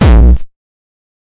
xKicks - Xkor
Do you LOVE Hard Dance like Gabber and Hardstyle? Do you LOVE to hear a great sounding kick that will make you cry its so good?
Watch out for This kick and Several others in the xKicks 1 Teaser in the Official Release Pack.
xKicks 1 contains 250 Original and Unique Hard Dance kicks each imported into Propellerheads Reason 6.5 and tweak out using Scream 4 and Pulveriser
180, 180bpm, bass, beat, dirty, distorted, distortion, gabber, hard, hardcore, kick, kick-drum, kickdrum, single-hit